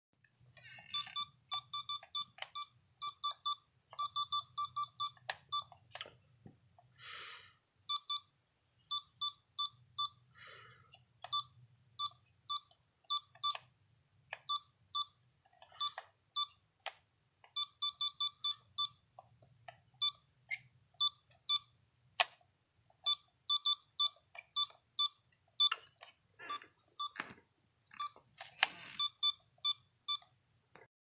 Literally just beeping
It's the sound of one of those little Spongebob SMS Chat Messenger devices beeping, because, OBVIOUSLY, i'm pressing all sorts of buttons
Recorded with my LG Stylo 3 Plus
button, beep, beeping